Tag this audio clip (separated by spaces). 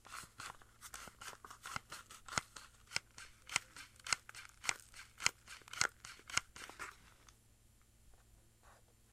SonicSnaps Germany January2013 Essen